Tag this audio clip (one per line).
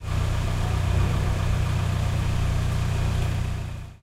campus-upf
car
centre
comercial
glories
mall
motor
park
parking
shopping
UPF-CS13